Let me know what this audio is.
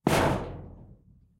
small-metal-hit-11
Metal rumbles, hits, and scraping sounds. Original sound was a shed door - all pieces of this pack were extracted from sound 264889 by EpicWizard.
blacksmith, hammer, industrial, industry, lock, metal, metallic, percussion, shield, shiny